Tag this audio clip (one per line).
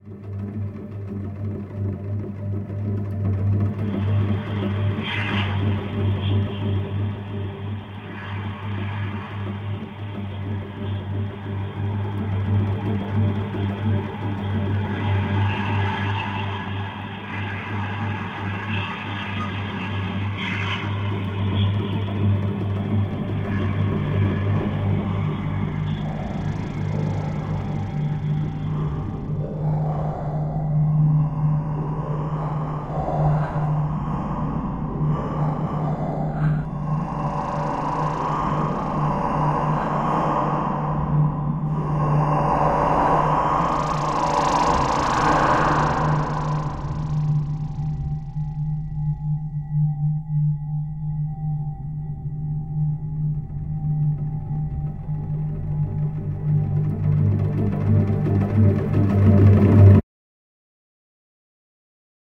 bubble
factory
noise